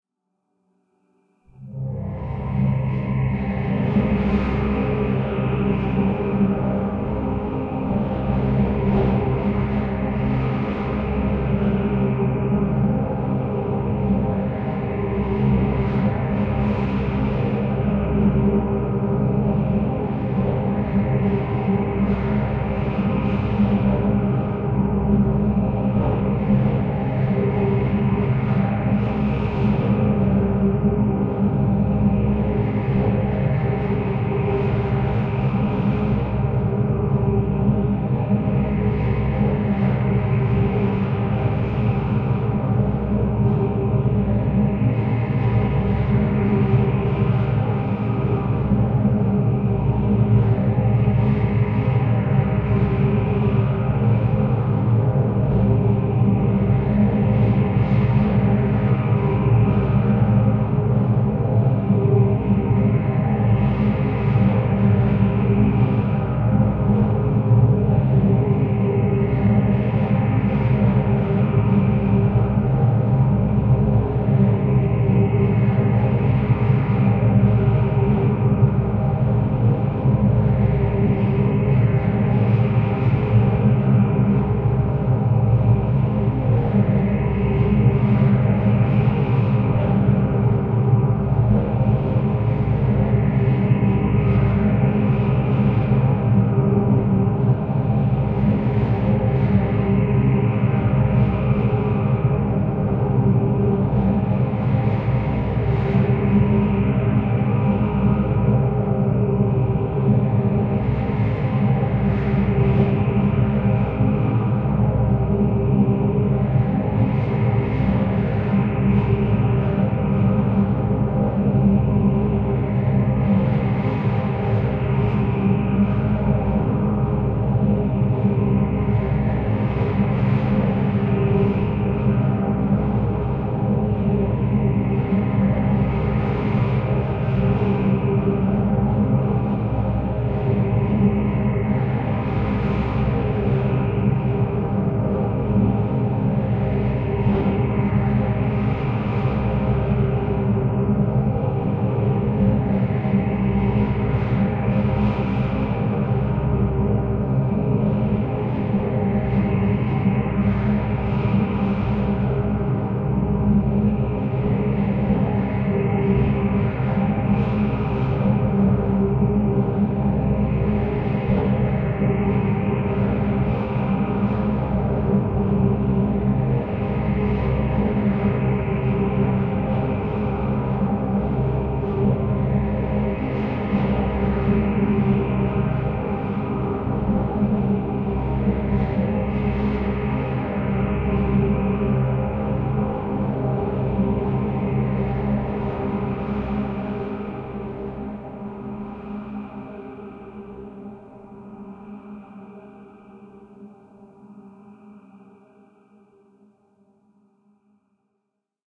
LAYERS 017 - MOTORCYCLE DOOM 2-50
LAYERS 017 - MOTORCYCLE DOOM 2 builds further on LAYERS 017 - MOTORCYCLE DOOM. It is this sound mixed with a self created pad sound from the Discovery Pro VST synth with a Detroit like sound but this sound is processed quite heavily afterwards: first mutilation is done with NI Spectral Delay, then some reverb was added (Nomad Blue Verb), and finally some deformation processing was applied form Quad Frohmage. To Spice everything even further some convolution from REVerence was added. The result is a heavy lightly distorted pad sound with a drone like background. Sampled on every key of the keyboard and over 3 minutes long for each sample, so no looping is needed. Please note that the sample numbering for this package starts at number 2 and goes on till 129.
soundscape, experimental, evolving, multisample, artificial, drone